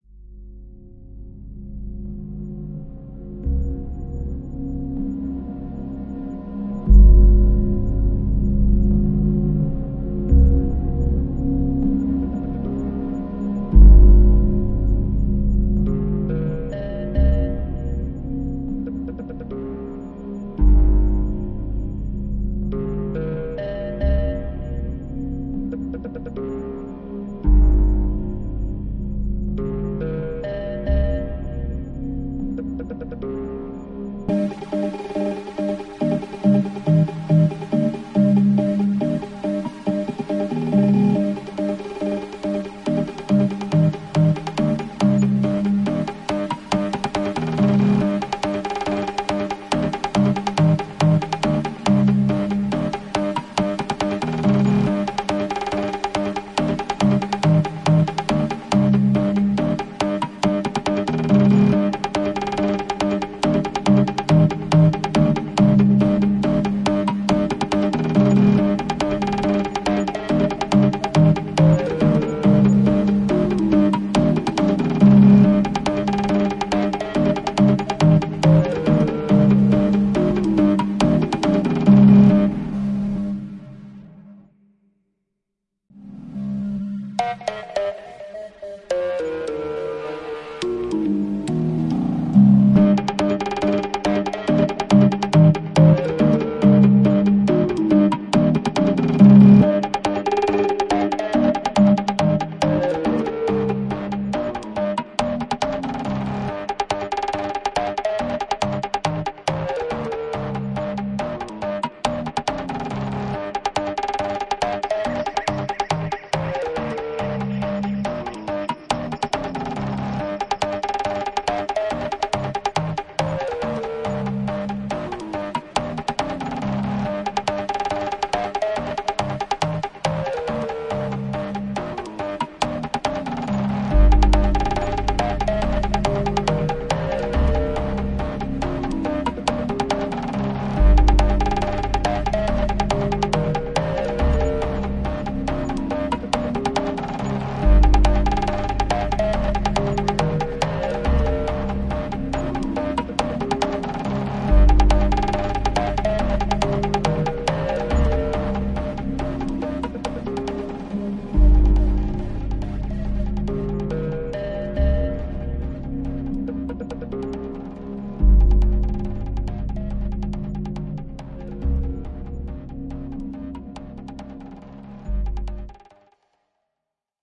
SpySynth - (140bpm)
Yet another somewhat fast paced high pitched synth with hints of smooth pad sounds. enjoy! Apologies for the overabundance of distortion. I'll try and upload a cleaner version later.
Drums, Loop, commercial, Sound-Design, Synth, Bass, Atmosphere, Drone, Pad, Ambiance, Piano, Looping, Ambient, Cinematic